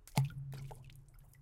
Dropping small stone in still water surface
dropping-stone, surface, water